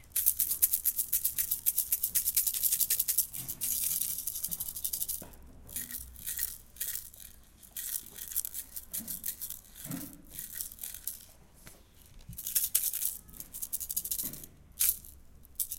Mysound CEVL Thalyson
Sharpener with pencil points
2013, Lamaaes